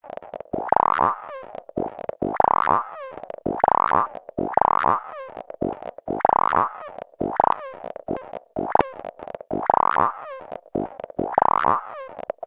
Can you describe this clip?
One in a series from a very strange and wonderful patch I created with my Nord Modular. This one is weird and squidgy and almost rhythmic, but not totally on his feet.